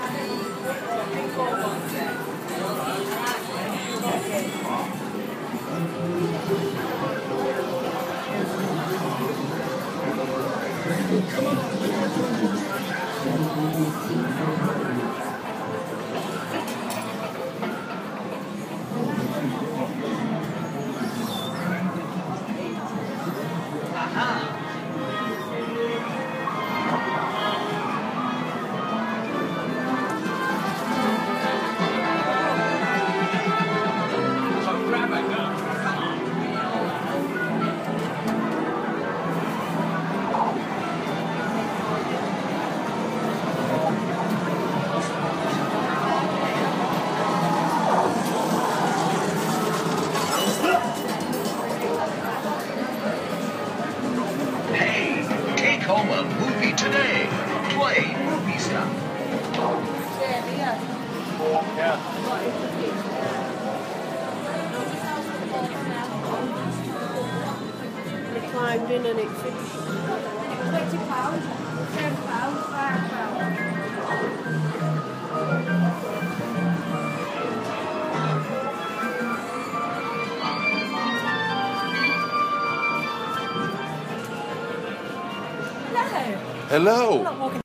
Walk through Arcade in Blackpool England with many different video games and machines.
arcade, game, games, hall, sounds, video